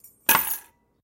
23 Keys Falling to single hit Snare
I hope they are helpful for you! There are many snares, a few kicks, and a transitional sound!
rim, snare, drum, drums, 1-shot